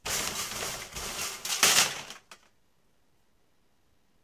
Raw recording of aluminum cans being thrown at a tin heating duct. Mostly high-band sounds. Some occasional banging on a plastic bucket for bass.